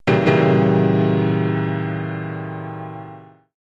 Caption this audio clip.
piano hit
boy was I bored this morning....request as thus....no idea if I was on the right lines!
"I'm looking for a scary sound for a fright, something like the "chan-chan" which sounds in horror movies, when people suddenly see a killer or a ghost."
chan-chan, fright, horror, horror-piano, piano, piano-hit, suprise